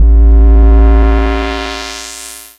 Logotype, Raw Intro Outro, Trailer 01
Logotype / Raw Intro Outro / Trailer
This sound can for example be used for logos / logotypes in videos, for example tutorials, or why not for example use this sound in a documentary about someone who had a tough life; maybe an MMA-fighter telling about his hardships as a youngster in the streets? - you name it!
If you enjoyed the sound, please STAR, COMMENT, SPREAD THE WORD!🗣 It really helps!